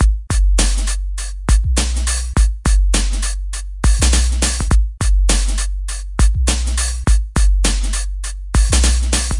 102bpm clean boom beat
Clean 102 bpm boom break beat made in Sony Acid Pro 7 from free individual percussion strike samples without any FX.
dance, 102bpm, boom, beat, 102, percussion-loop, breakbeat, 102-bpm, percs, break, groovy